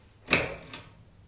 Sound of chain operated light switch turning on and off.